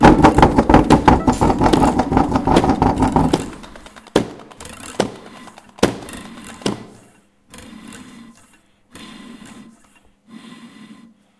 SoundScape GPSUK serin,tyler,archie final
galliard, soundscape